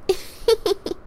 A short giggle.